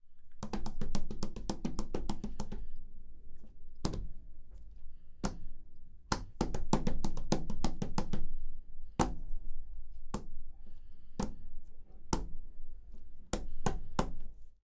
20. Pasos niña ver1
fast steps on wood
fast, steps, wood